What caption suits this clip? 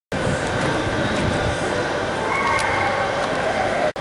Shopping Mall noise 11
Noise recorded at Manufaktura- Shopping Mall in Łódź, Poland
It's not reminded by any law, but please, make me that satisfaction ;)
center, city, d, mall, noise, people, poland, shopping